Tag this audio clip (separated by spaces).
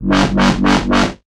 electronic FL